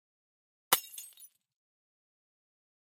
Breaking Glass 03
break, breaking, glass, shards, shatter, smash